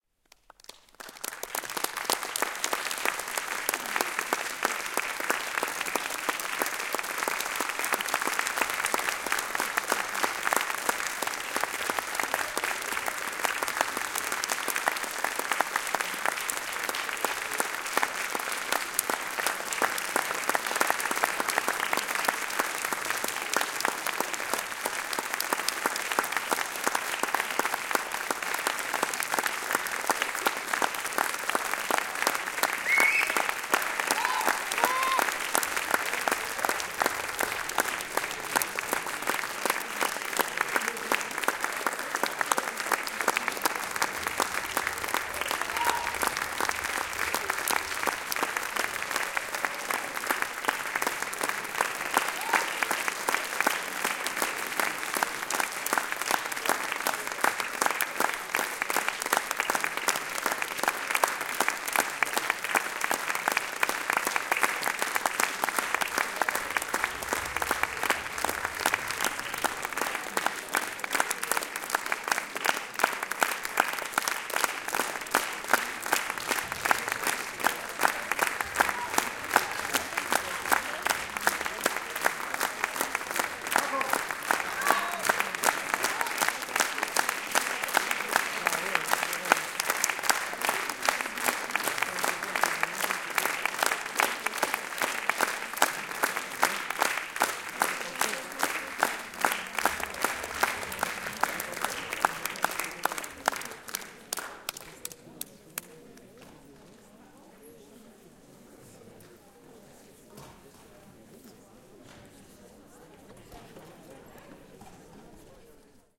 R4 00381 FR Applause
Applause recorded in a medium sized theatre hall located in Nanterre (suburb of Paris, France). At the end of the file, applause stop and people start to leave the hall while chatting.
Recorded in December 2022 with a Centrance MixerFace R4R and PivoMic PM1 in AB position.
Fade in/out applied in Audacity.
indoor, hall, clap, auditorium, cheer, hand-clapping, atmosphere, ambience, people, field-recording, hands, Nanterre, wide, show, cheering, crowd, theatre, audience, medium-sized-room, large, claps, enthusiastic, voices, applause, applauding, concert, France, applaud, clapping